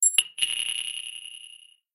high frequencies repeted sound (sound design in protools)
frequency, loop, electronic